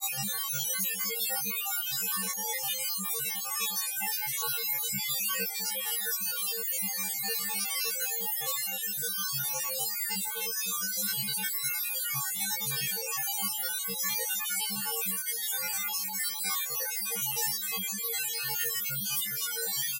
Another batch of space sounds more suitable for building melodies, looping etc. Jungle like rain forest...